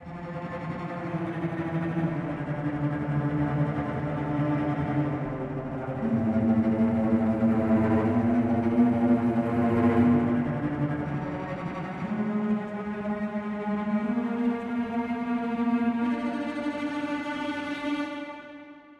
A simple music piece using spitfire audio labs